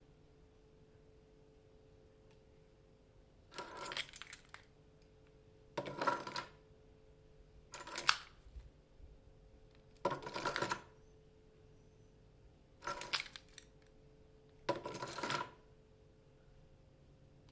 This is someone picking up and putting down a set of house keys that has various keys and two remotes. It is being placed on a wooden table.